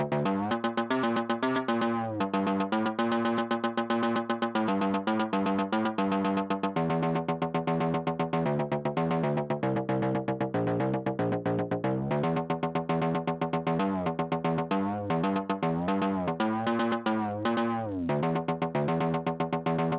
Dark Time Funky Sequence
Only one step is active on the Dark Time. The signal is routed trough NI Massive (Synth) in Ableton 9.
Doepfer-Dark-Time, Rhythm, Analog, Sequence, Synth